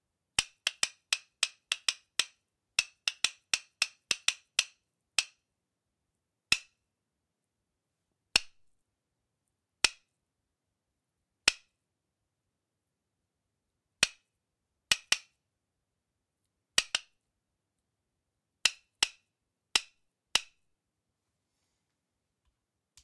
A children's castanet
castanet, toy, spanish